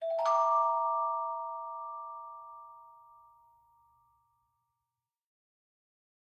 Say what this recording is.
Celesta Chime
Chime for a cartoon spy game/movie.
bell, Chime, Chimes